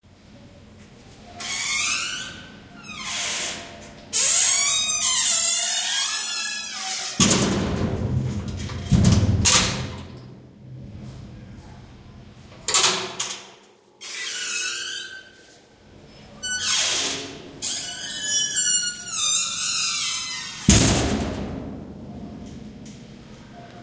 Light Metal Door Closing and Locking
A high-quality recording of an old metal bathroom stall. Could easily be slowed down and or pitch adjusted for use as an old jail cell door, metal door, eerie sound, etc.
old-jail-cell, rusty-metal-door, field-recording, Metal-door, ambience, metal-bathroom-stall